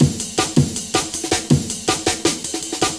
Old skool jungle break.
drumnbass, skool, break, amen, jungle, breakbeat, breaks, dnb, drums, old